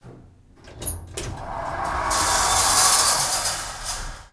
Part of a bunch recordings of an elevator. One of the sounds being me sneezing.
I find these sounds nicely ambient, working well in electronic music that I myself produce.